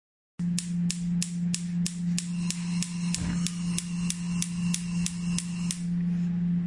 The clicking sound of a gas stove